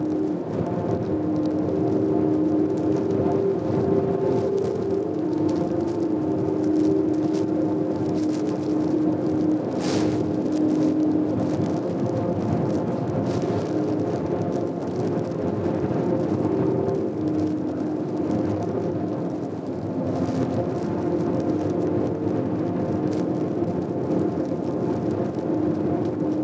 Abashiri wind snow bars
Recorded Abashiri, Japan, 2007
wind-snow-bars, Japan, Abashiri, field-recording